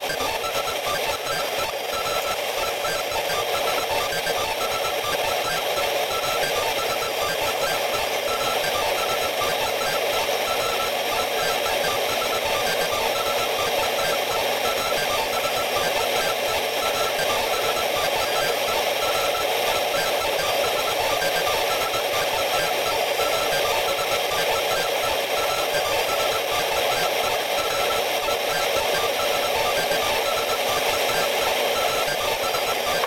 Digital Data Beeps
Sci Fi digital beeps, data transferring in computer. Created from a feedback loop and the sound of an iMac. Recorded with a Fostex FR-2 LE.
digital, beep, computer, data